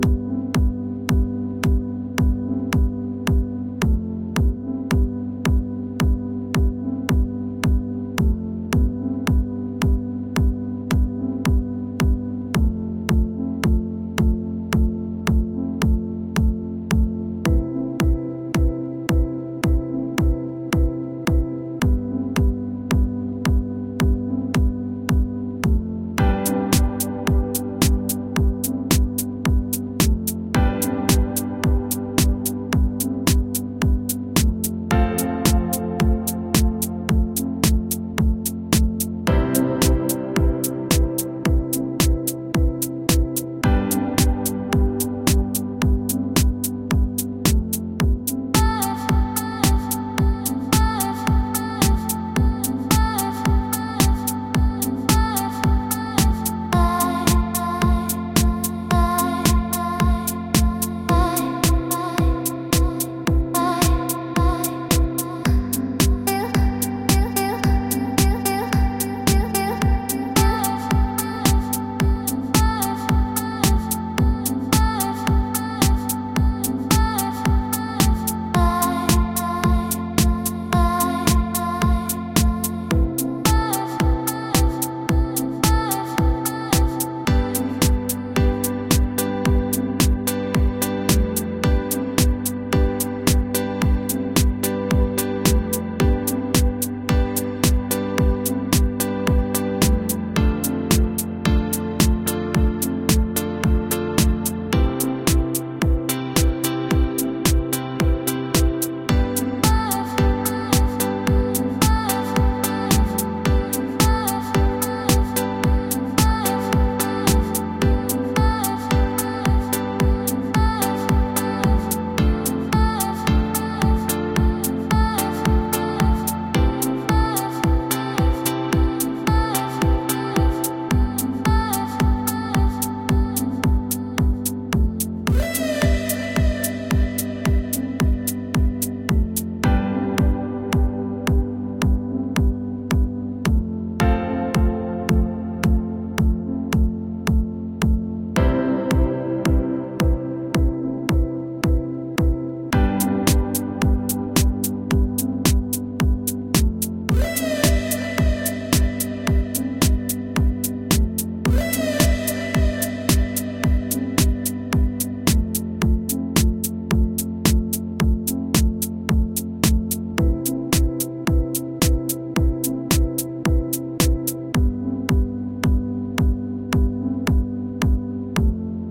ARTE ELECTRONICO -110 LOOP
synths: Ableton live,komtakt,Silenth1,Reason-
club, bass, electro, house, techno, dance, original, track, electronic, bounce, effect, loop, rave, piano, sound, trance, voice, ambient, music